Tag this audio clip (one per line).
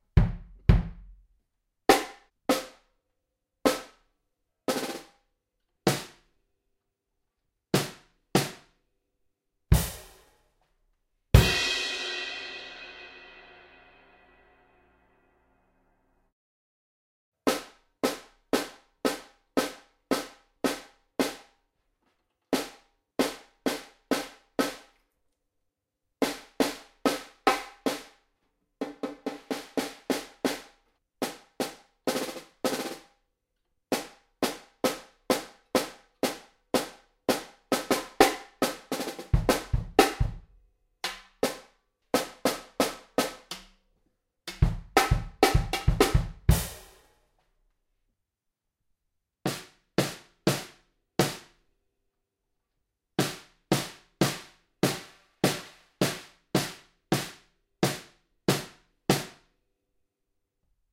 crash
drums
hihat
hip
hop
kick
kit
snare